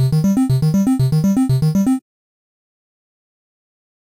8 bit arpeggio 001 minor 120 bpm triangle 013 C2
beat, bass, sega, bpm, synth, bit, gameboy, mario, gamemusic, atari, loops, free, 120, loop, gameloop, 8bit, 8-bits, 8-bit, game, 8, nintendo, music, electro, electronic, drum